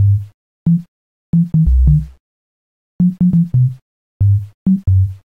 Bass Tontonn - 2 bar - 90 BPM (swing)
Another bass sample found on my repertory. Low freqeuncy, it may not be heard in some speakers.